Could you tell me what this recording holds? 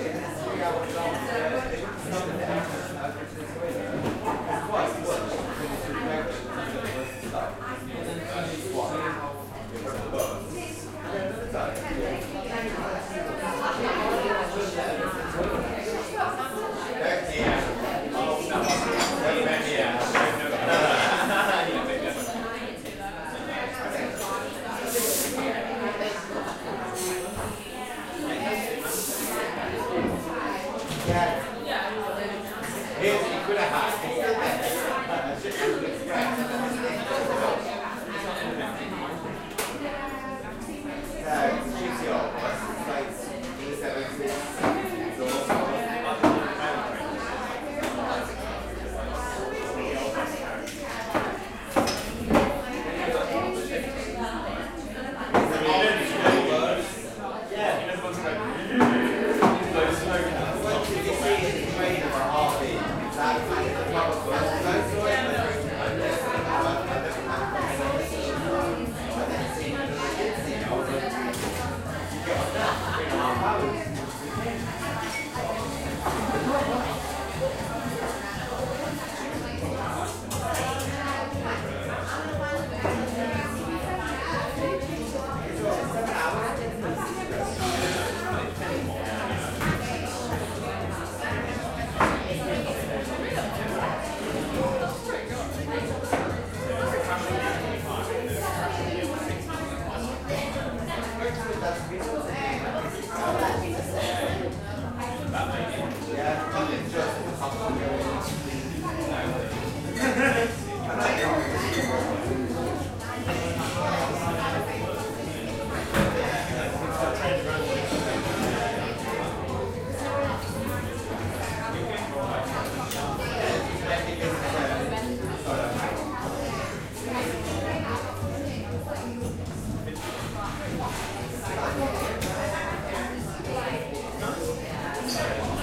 cafe - takk, northern quarter, manchester
Cafe in the northern quarter, Manchester